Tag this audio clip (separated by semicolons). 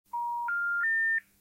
phone; tone; no-answer